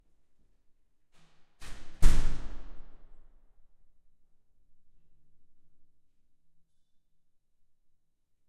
Recorder: Fostex FR-2
Mic(s): 2x Audix SCX-1 O (Omni)
Mic Position(s): about 15cm from L/R walls of a 1.5m wide, but long hallway; 2m away from door; about 1.5m height; 'outside'
Post-processing: None
Free text:
This is a 'blooper' section of the other recordings from the package;
While my colleague and I were recording the door, which is within a hallway of a shared living house, another inhabitant needed to go through;
I cut away the foot steps, but left the closing of the door, which is in fact the sound of two doors (The second is about 10 meters away).
The long hallway has several of these doors, and going through them sequentially sooner or later ends up in two of these automatic doors closing simultaneously.
Also see other recording setups of same door within package.
door, metal